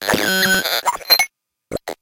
This is a short sample of some random blatherings from my bent Ti Math & Spell. The title is my best effort at describing the sound.